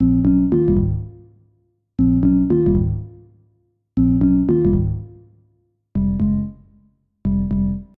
Mystery Book
A Mystery Type Sound
dark, mystical, thinking